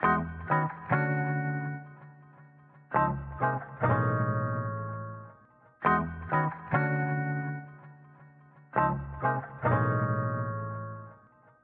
165 bpm - Broken Beat - Guitar
This is a small Construction kit - Lightly processed for control and use ... It´s based on these Broken Beat Sounds and Trip Hop Flavour - and a bit Jazzy from the choosen instruments ... 165 bpm - The Drumsamples are from a Roality free Libary ... Arranged with some Free Samples from Music Radar ...